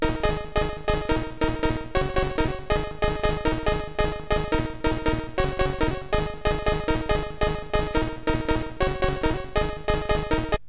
melody synth techno electronic pattern
pattern, techno